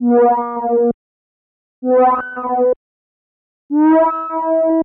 ARP Odyssey "wow"
Series of three ARP Odyssey pseudo-vocal sounds similar to "wow".
analog, ARP, Odyssey, synth, synthesizer, wow